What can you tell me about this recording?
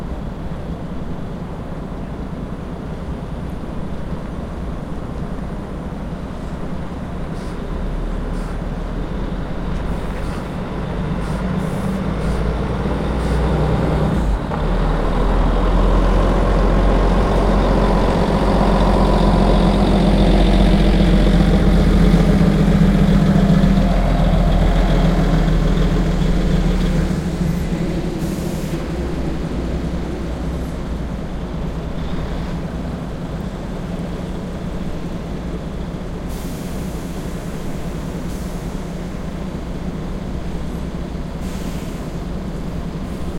A sound of a bus passing by at the busstation.